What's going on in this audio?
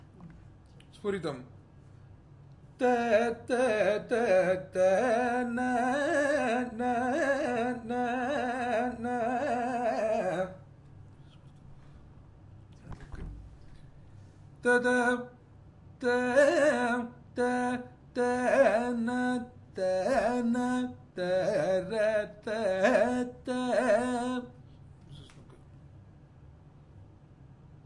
Kalyani - Sphuritam
In this recording, done at IIT Madras in India, the artist performs the Sphruitam gamaka several times.
music, india, gamaka, carnatic, compmusic